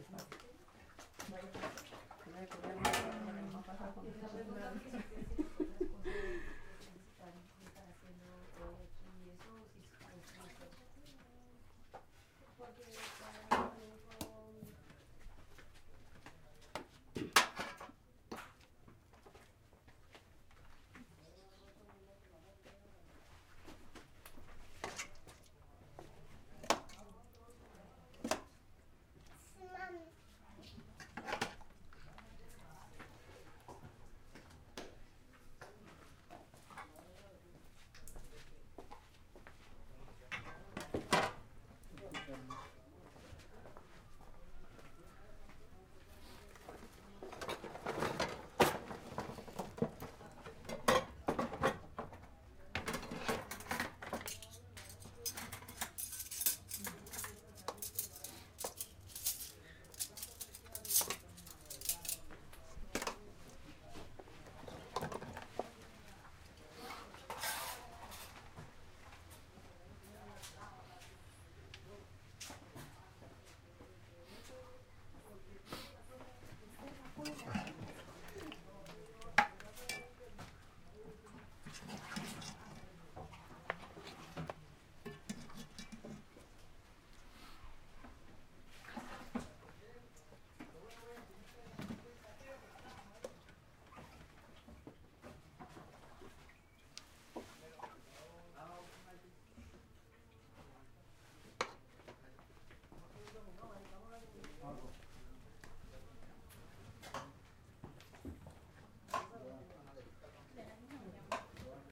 cooking sounds and metal utensils preparing meal in hut kitchen bgsound Saravena, Colombia 2016